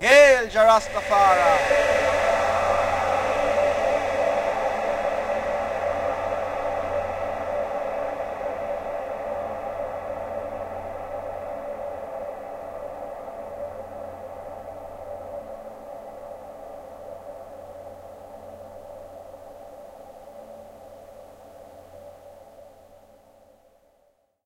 Hail Jah Rastafari - A Cappella Chant with echo/delay
delay, hail, hail-jah-rastafari, rasta, reverb